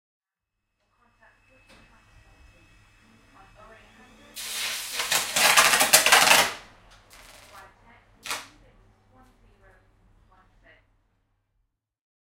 Electric Sparks, Railway, A
Audio of electricity violently sparking from railroad contact with a commuter train at Milford Station, Surrey. There had been a heavy frost over night causing ice to appear on the lines and workmen were trying to de-ice them. Due to the track power lines being iced, this caused a more violent electric outburst than usual when they came in contact with a train, and this was what one sounded like as the train tried to leave the station.
An example of how you might credit is by putting this in the description/credits:
The sound was recorded using a "H1 Zoom recorder" on 25th November 2017.
electric, electricity, railroad, railway, spark, sparks, train